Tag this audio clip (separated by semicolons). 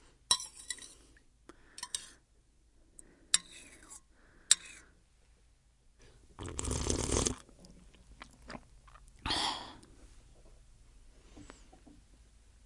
Tasting; Food; Soup